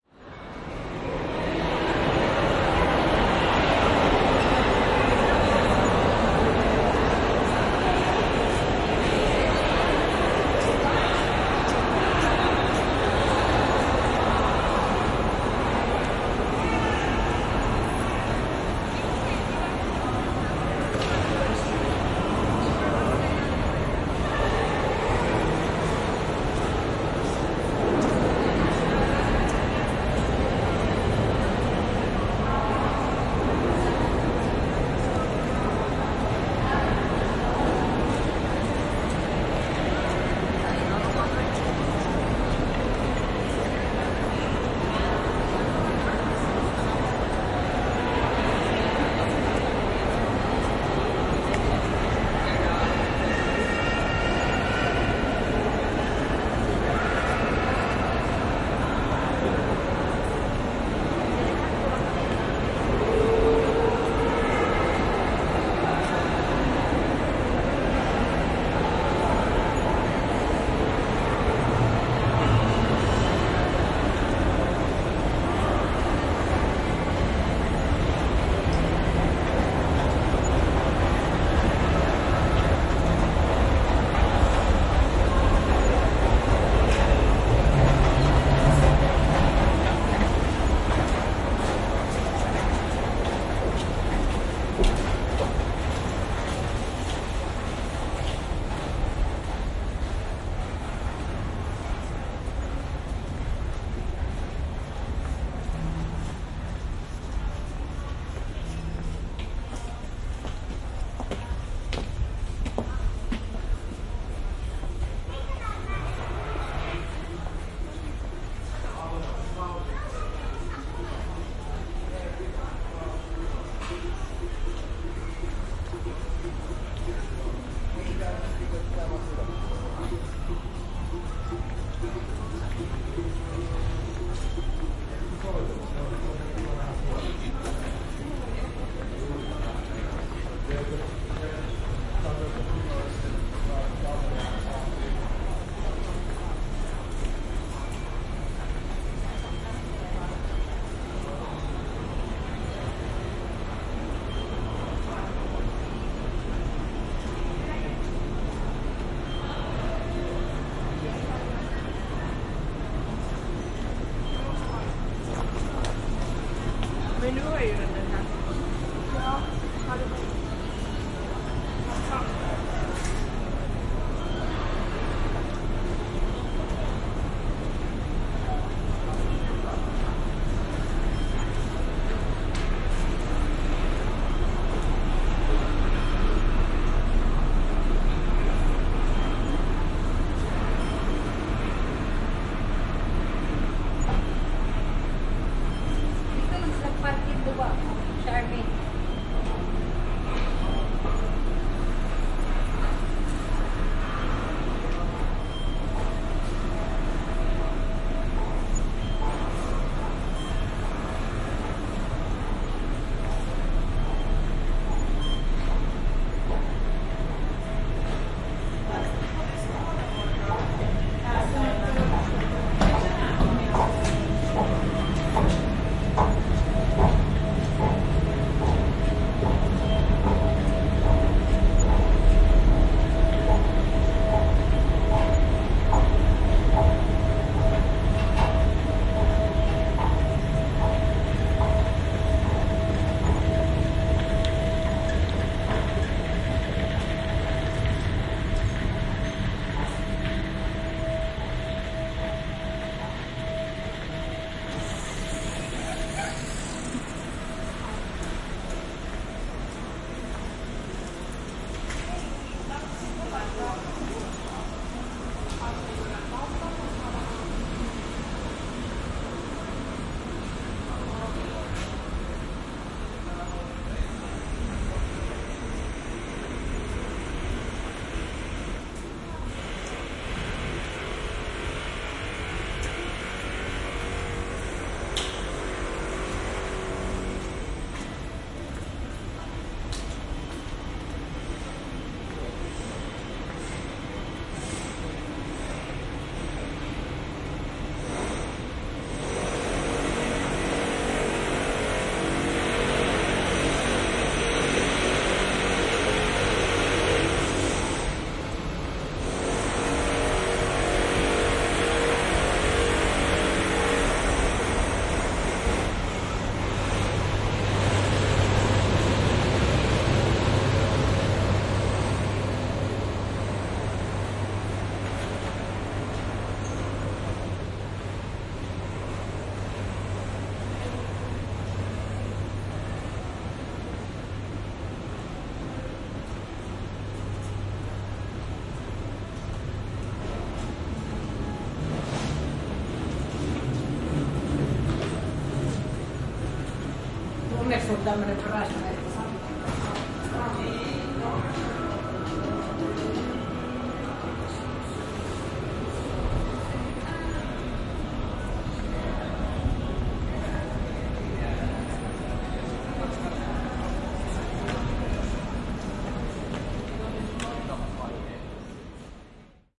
Soundwalk through the Helsinki train station. This is a recording made on an iPhone SE using the now discontinued Sennheiser Ambeo VR headset for binaural "3D" recording. Levels were normailized after.